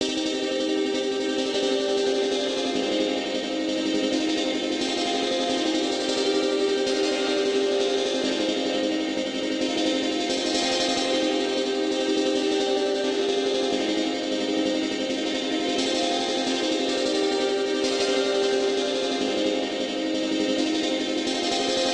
175 -dnb arppe
A processed Loop i want to use in one of my dnb Projects. Made with the Vintage Synth Collection Retro Machines from NI. A small part oft few New Elements i created. Usually i dont do very much with Audio Files because most Production is made by Midi.
Arppegiated, Bass, Dnb, Drum, n